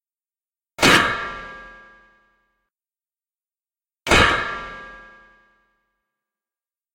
Steel Spring Bear Trap
Hard Hit metallic bear spring trap clamps shut
Close, Shut, Trap, Bear